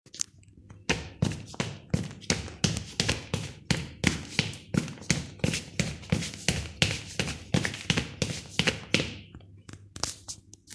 Footsteps - sneakers on concrete (running 2)
More running indoors :)
concrete, floor, footsteps, run, Running, sneakers, steps